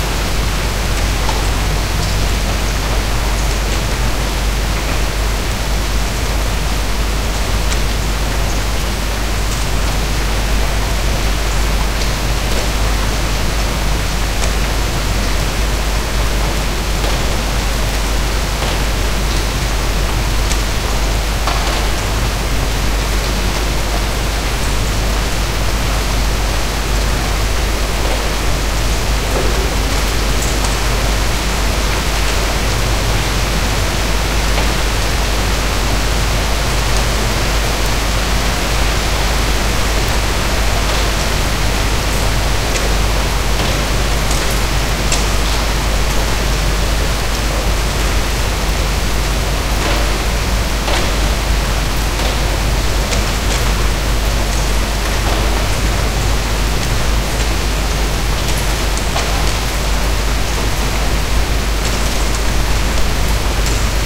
Rain in the city, in the courtyard of my flat to be specific.
Recorded with Zoom H2. Edited with Audacity.